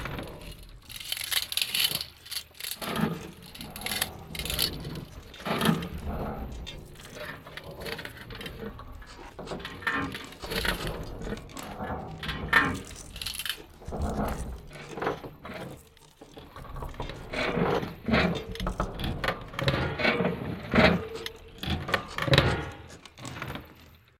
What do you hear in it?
Audio meant to imitate the sound of people working.
It was created from an edited recording of handling, and operating
a toaster oven.
This sound, like everything I upload here,
work, metal, artificial, hammering, building, sfx, sound-design, hit, banging, synthetic, sound, constructing, working, hitting, construction, free